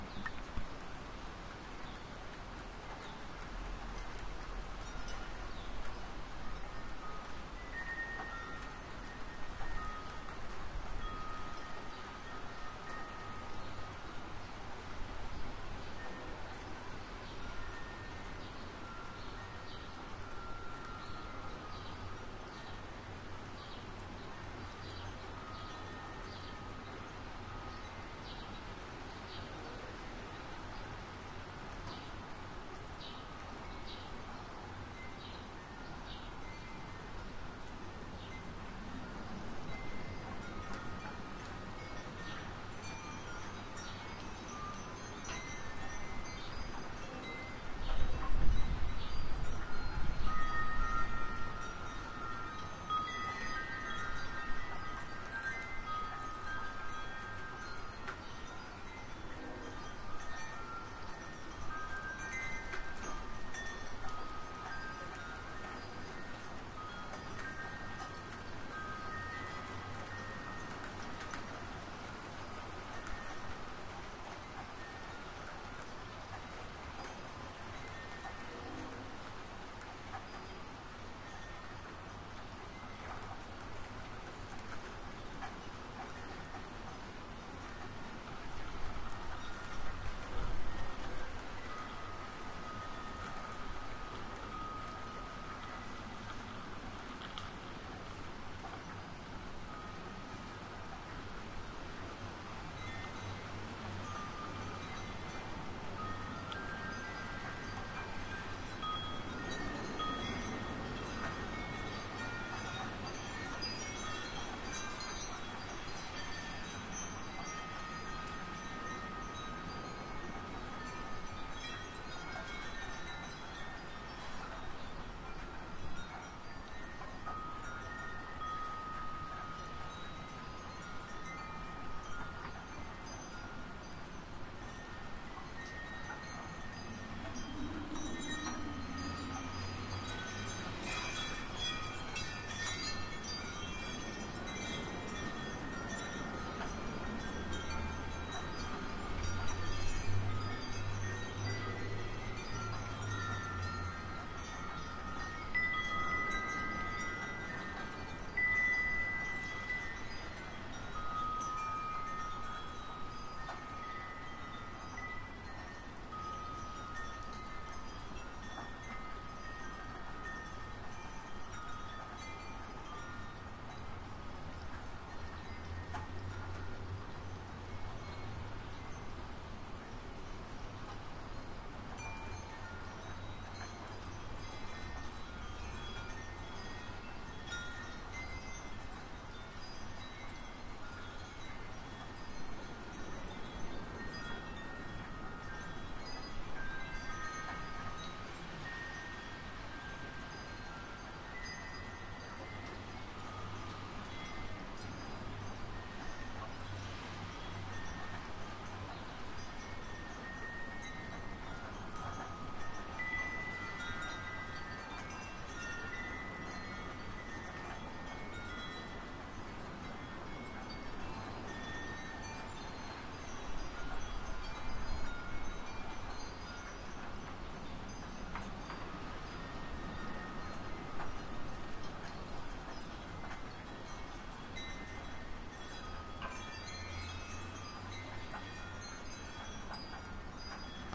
Rain Wind Chimes
recorded with Sony PCM D50
Chimes, Rain, Wind